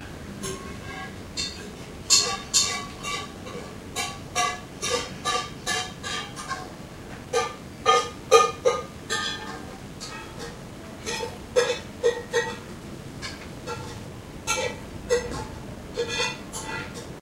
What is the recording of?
pot scrape
accidental recording of hand cleaning of stainless steel saucepan when camcorder left on. Audio shortened by reducing some lengthy gaps between scrapes and applying one "loudness" level for clarity (mic was approx 6 m away) using Adobe Soundbooth CS3.
metal, kitchen, clean